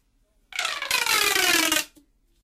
One of those bendy straw tube things